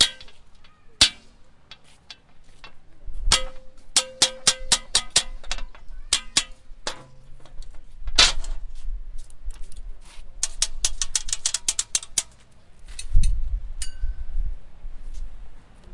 rithm on metal traffic signal